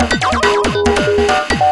140bpm Jovica's Witness 1 2
140bpm, electro, experimental, jovica, weird